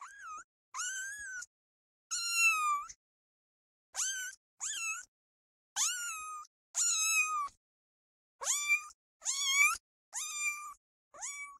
My kitten is meowing at the mic. Just cute ! (no animal were harmed during the recording)